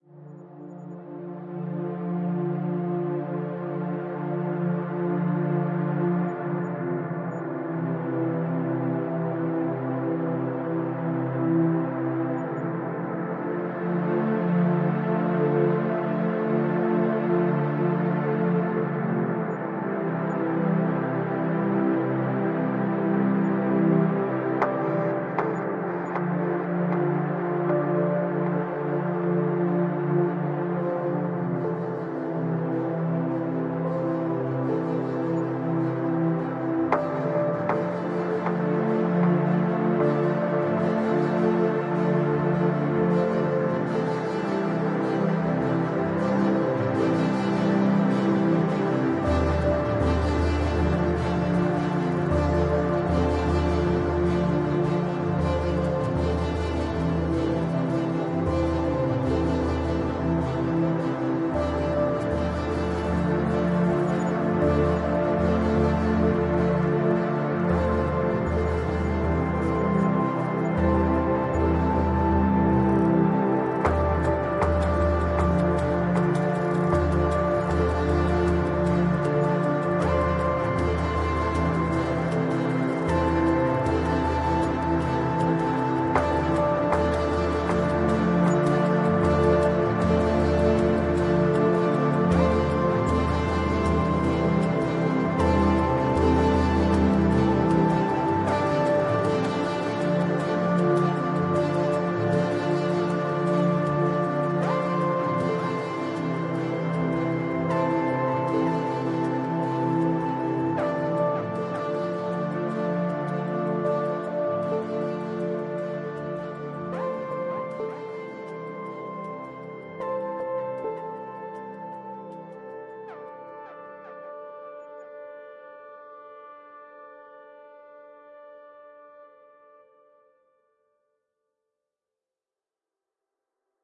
Exotic Scene track with nice and warm sound. Track features piano. Cosmic lead. Space pad.